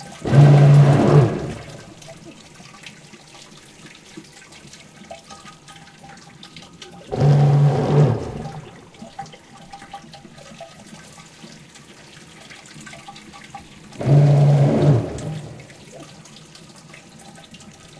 TOILET LOOP
This sound come from the toilet out off order. Recorded with Roland 26R, Stereo Intern / Micro.
House, Water, Loop